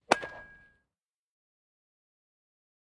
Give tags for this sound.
ball
baseball
foley
hit
honkbal
match
metal
ring
slagbal
strike

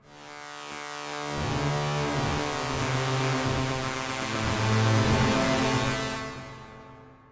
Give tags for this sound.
glitch
loop
pad
sound-design
synthesis

